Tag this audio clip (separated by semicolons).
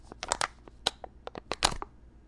cup; jell-o; lid; opening; pudding; snack; snack-pac